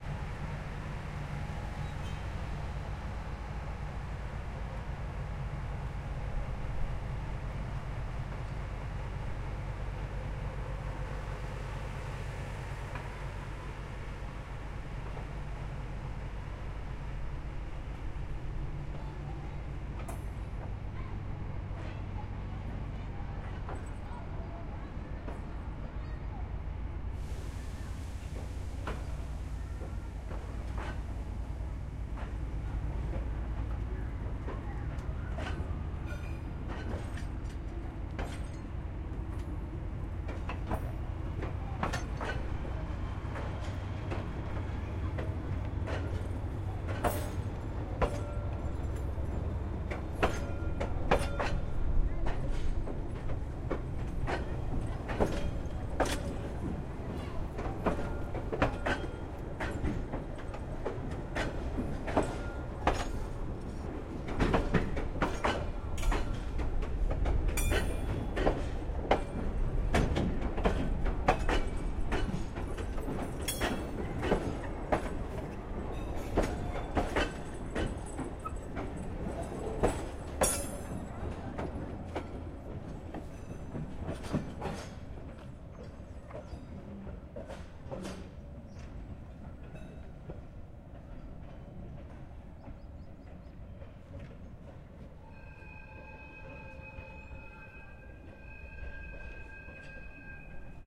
Gasoil train leaves station, slowly. Tupiza, Bolivia.
Small train station in Tupiza, south Bolivia.
Recorded with Zoom H4N using internal mics (XY). Mics at a 50 cm distance from the rails.
bolivia, field-recording, gasoil, machine, rail, train, tupiza